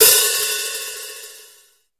Gui DRUM CO
drum, guigui